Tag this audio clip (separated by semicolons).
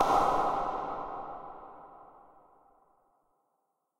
tennis; hit; far; smash; ball